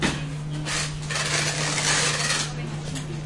A clerk taping up a package in the campus bookstore at the University of Florida, Gainesville, FL. Recorded using a DV videocamera.
packing-tape, retail